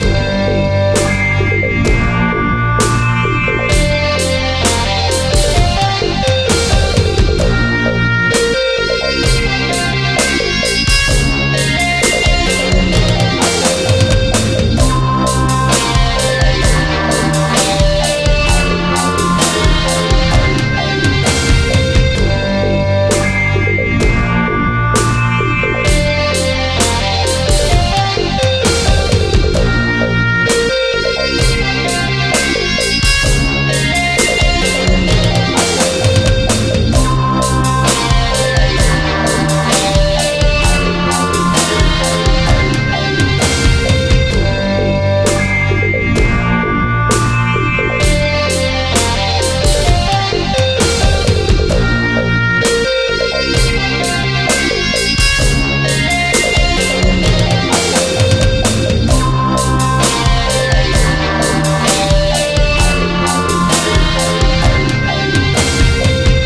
The Edge
A short loop cut from one of my original compositions. 130 BPM - G minor.
BPM, synth, power, drums, Music, ominous, bass, Loop, 130, dread